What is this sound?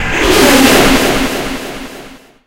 Echo Jumpscare
jumpscare, scary, scream